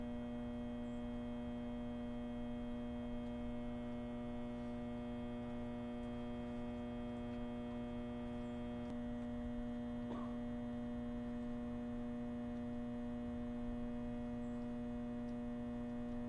Hig Voltage Transformer
It make the vroom sound